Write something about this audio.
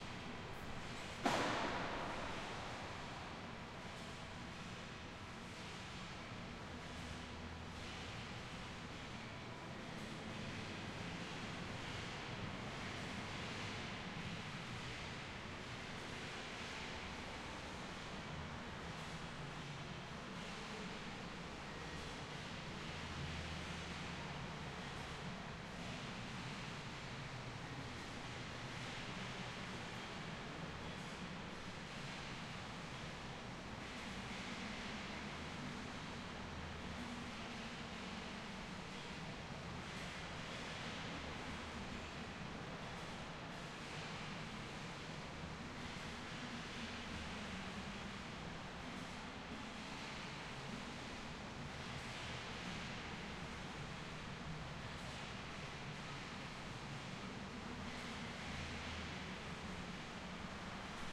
01 - Ambience reinforced concrete plant
Anbience sound of reinforced concrete plant near Moscow. Recorded on Zoom H6
ambience, industrial, machine, motor, noise